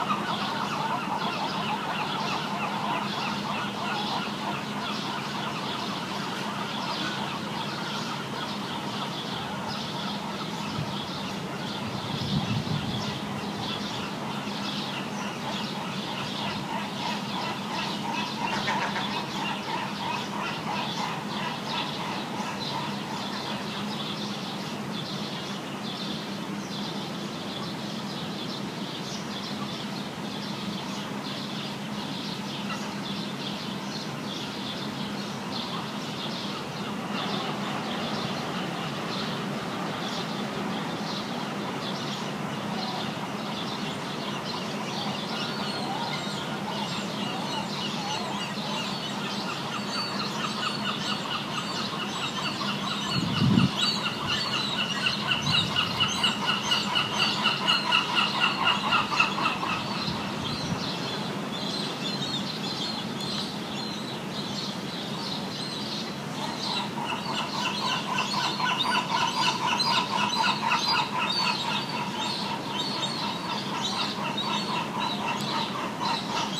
Morning Birds & seagulls
Recorded outside Hotel Valencia in La Jolla, California. There are birds and seagulls flying about, making various sounds.
ambiance, birds, bird, morning, birdsong, atmosphere, seagulls